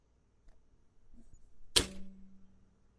Toaster oven done
Sound of toaster over being done.
oven, toaster